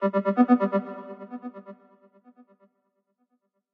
Low Frequency trill G Sharp major 128 BPM (with tail)
This sound was created using the Nord Rack 2X and processed with third partie efefcts.
Electro, Music, Dance, Loop, EDM, 128-BPM, Sample, Electric, G-Sharp-Major